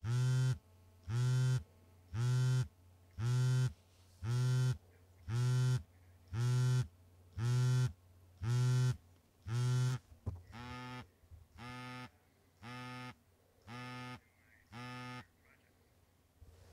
Sony Ericsson W300i receiving a call in 'vibrate' mode. First against a soft surface, and then held in hand.
Recorded with Apex410 Wide Diaphragm Condenser Mic through MBox2.
cellphone, cell, vibrate, phone